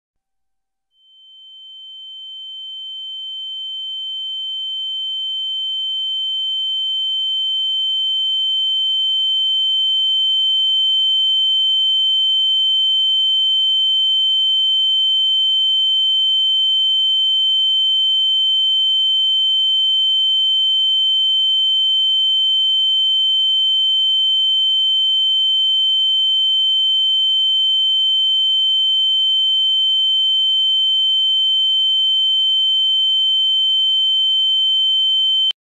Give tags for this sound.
poop
stressful

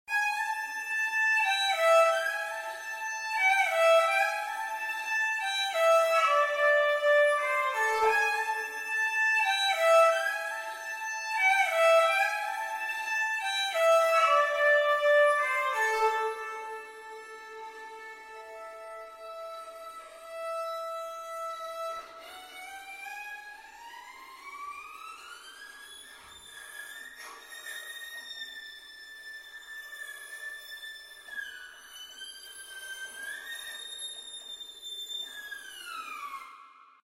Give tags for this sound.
bluegrass
sad
violin

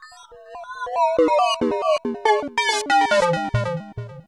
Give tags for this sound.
acid
alesis
ambient
base
bass
beats
chords
electro
glitch
idm
kat
leftfield
micron
small
synth
thumb

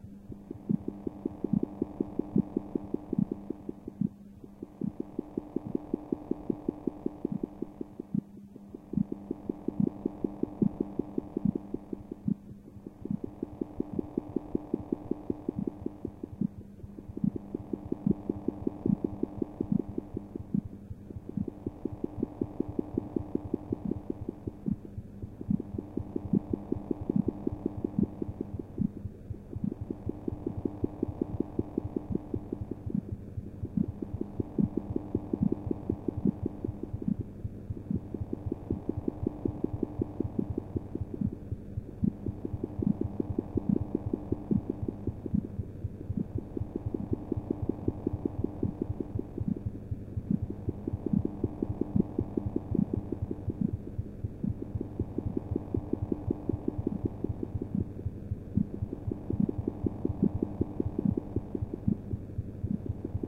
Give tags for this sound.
space
pulsars
stars
cosmic

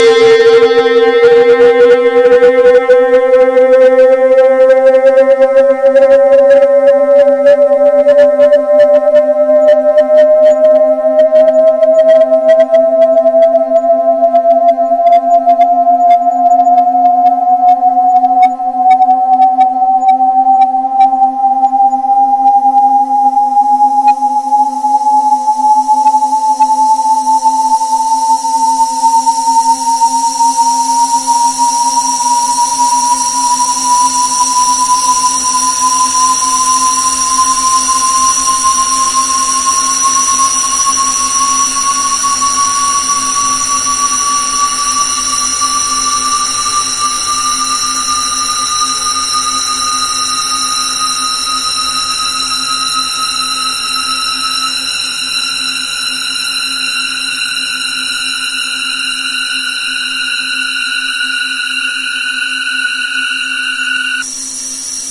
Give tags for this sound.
Machine
Machinery
Strange
Weird

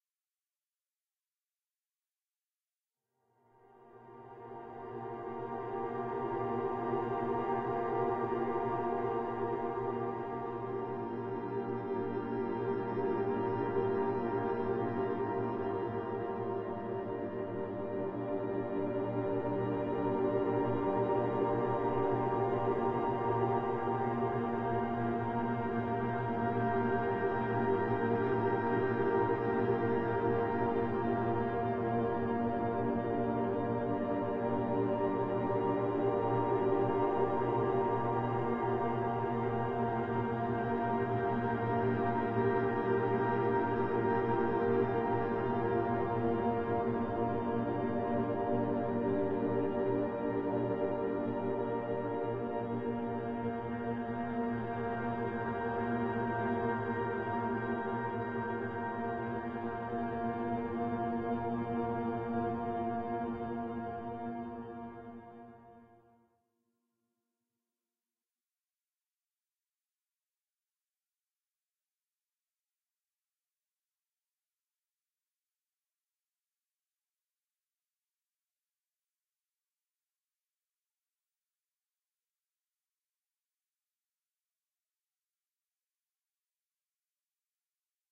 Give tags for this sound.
ambient
pad
soundscape

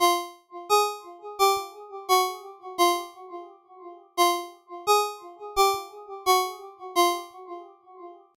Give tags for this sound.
ring
phone
alarm
mojomills
mills
cell
ringtone

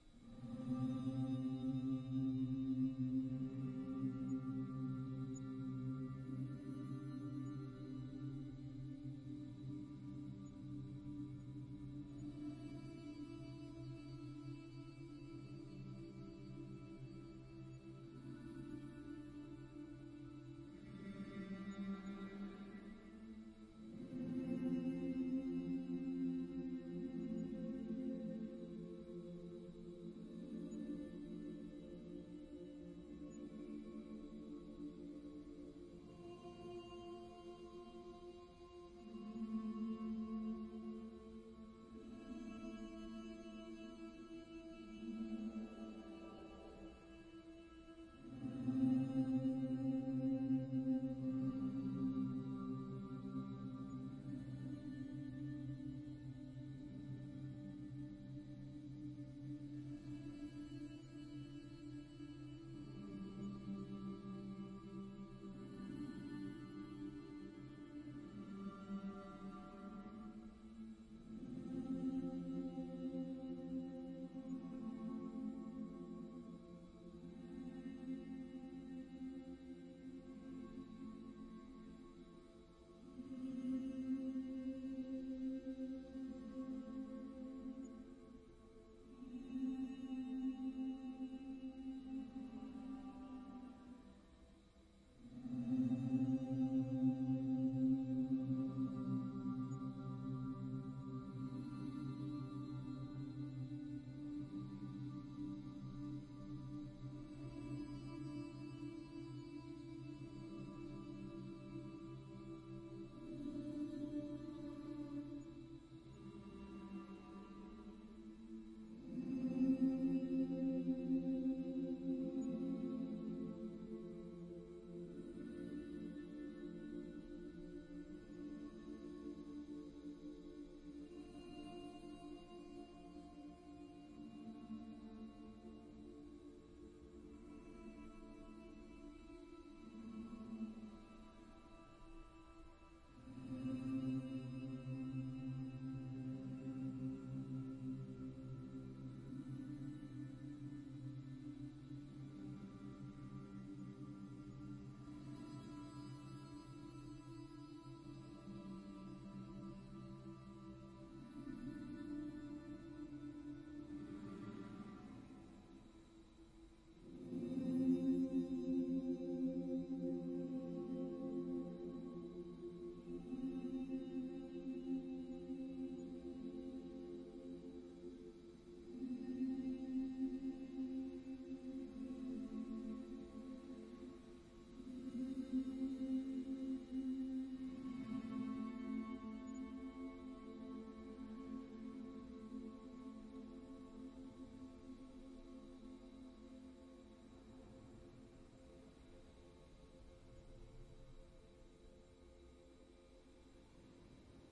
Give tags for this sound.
edit
sound-design
class

